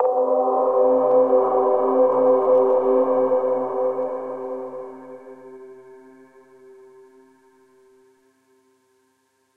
Ambient Synth Key (C Major)
This could also work as a pad if you raise the value of the attack. -Vanszi
C-Major, reverb, space, synth